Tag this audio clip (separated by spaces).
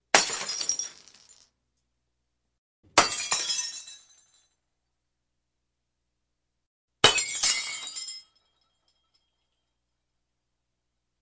smash glass shatter break